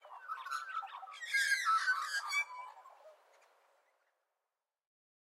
Magpie carroll
Short, sharp Magpie caroll. This is the sound of three birds. One starts then another two join in. Typical carrolling behavior.